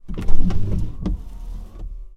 sons cotxe eixugaparabrises 3 2011-10-19
sound, field-recording, car